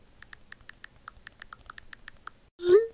Short Text and Send
iPhone text message with three words and send
iPhone, Send-text, Text